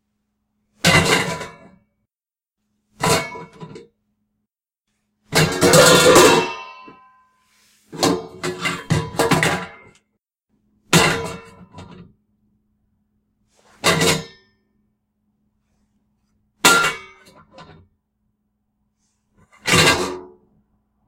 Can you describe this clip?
metal pan crashes 2
Metal pan being dropped, picked up, hitting things to make crashing noises.